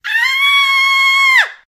Female screams for a horror movie. Recorded using a Rode NT2-a microphone.